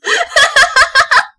dat laugh
lol i record my voice while playing video games now so that i can save certain things i say, you know, for REAL reactions to use for cartoons and stuff. a lot of my voice clips are from playing games with jumpscares, and that's where my screams and OOOOOOOHs come from. i used to scream ALL the time when playing jumpscare games, but now it's turned into some weird growl thing or somethin, i dunno. so yeah, lots of clips. there are tons of clips that i'm not uploading though. they are exclusively mine!
and for those using my sounds, i am so thrilled XD